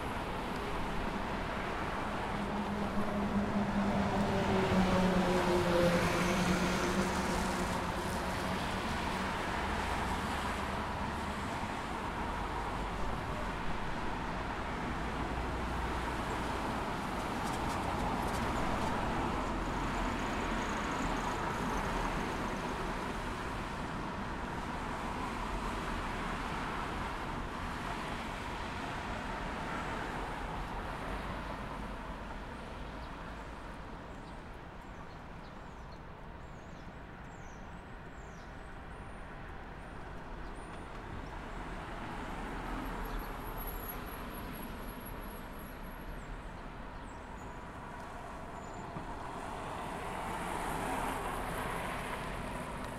Recorded on a Zoom H4n, ambient outdoor sound of a busy street, early afternoon.
Cars, Ambient, Street